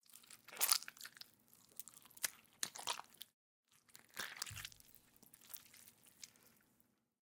Impacts Slushy Orange 002
A series of slushy, splattery impacts made by punching oranges. Great for fleshy, crunchy, disgusting moments!